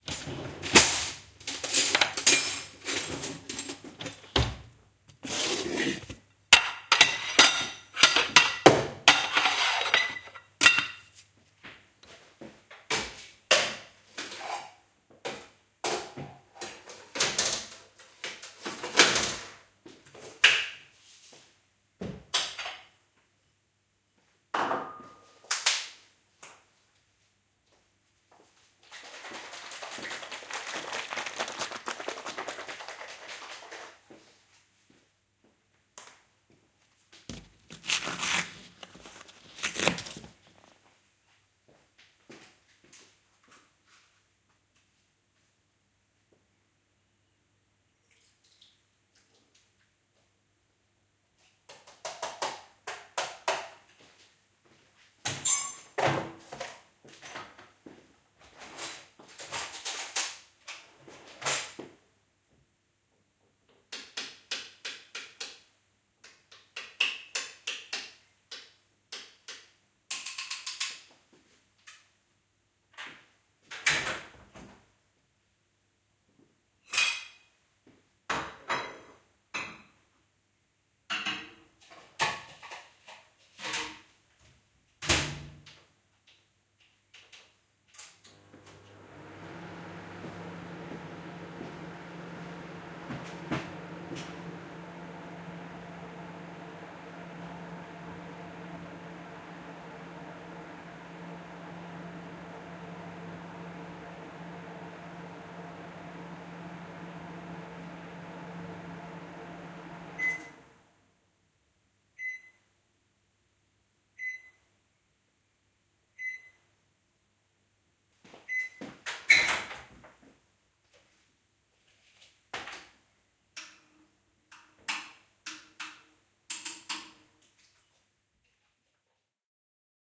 Kitchen Sounds - Clattering and Soup in the microwave
Clattering about in the kitchen drawer to get spoons out.
Getting a bowl out.
Shaking a carton of soup up, ripping the top off and pouring it into a bowl.
Tapping the carton to get the rest out and then throwing it into the pedal bin.
Opening the microwave door, putting the bowl of soup in, tapping out the numbers and then turning the microwave on.
Beeps at 1:50 to signal the end then open the door again.
Natural kitchen ambience.
Open, Natural, Ambience, Pedal, Spoon, Utensils, Shaking, Soup, Bin, Kitchen, Foley, Bowl, Microwave, Close, Cooking, Carton, Liquid, Beeps, Clattering